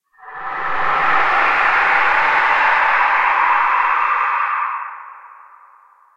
a drone produced from heavily processed recording of a human voice
processed, voice